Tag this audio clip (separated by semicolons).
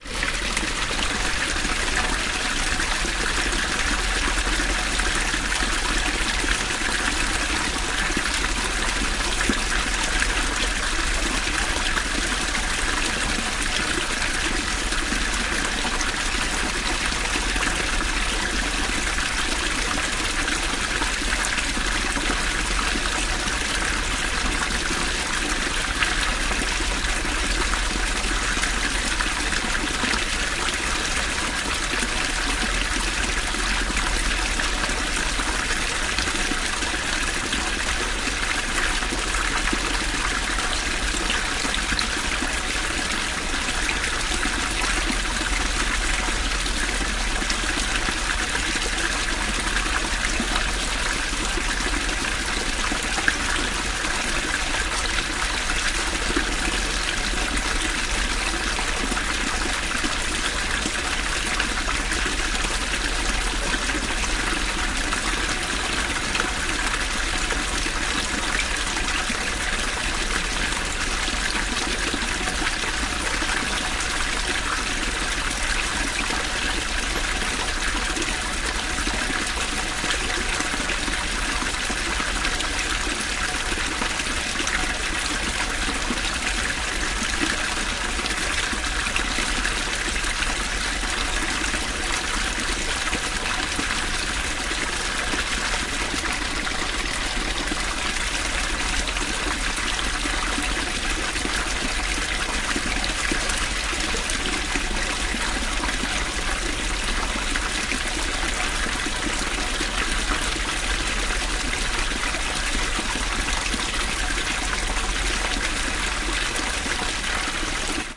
field-recording; fountain; korea; seoul; water